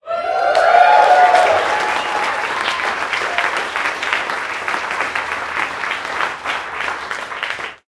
A group of people applauding.